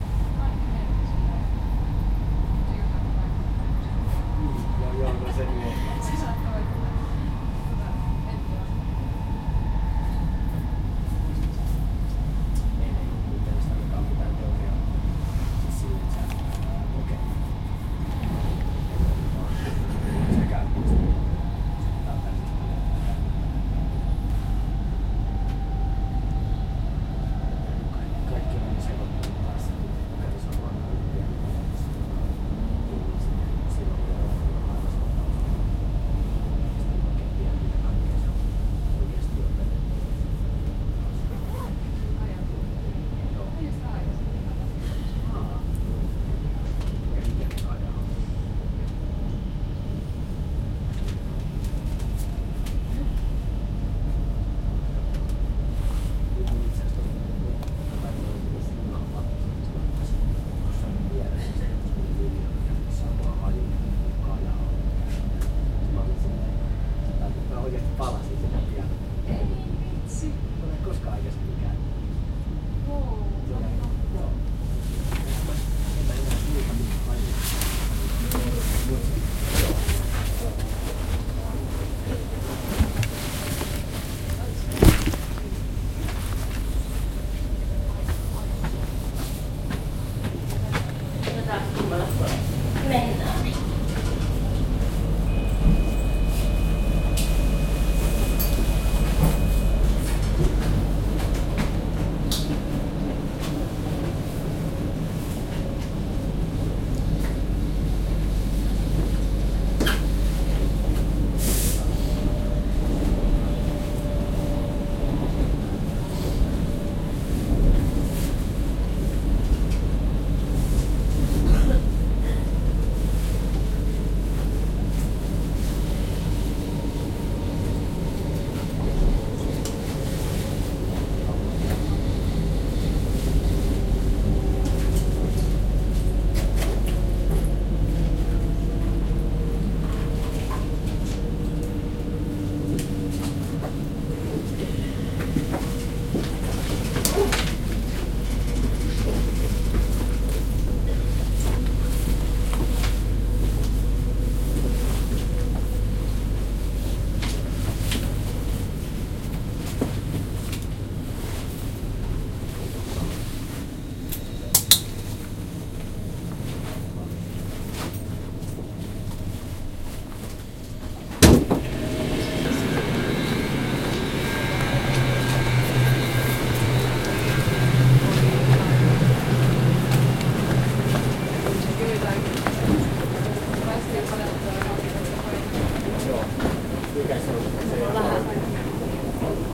local train - stops - exiting into railway station
local train stops, interior perspective, exit into railway station. recorded with zoom h2n and slightly edited with audacity. location: Riihimaki - Finland date: may 2015
location-helsinki-Finland, train, exit, railway-station, field-recording, interior